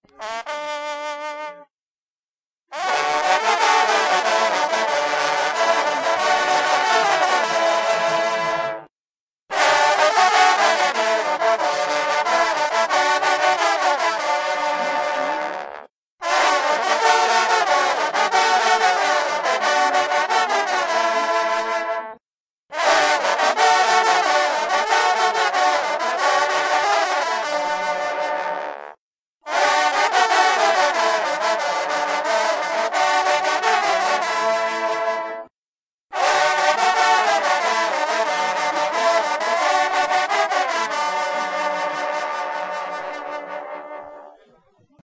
Hunting horn players recorded at a dog and hunting festival in La Chatre (France)
berry, france, horn, hunting, tradition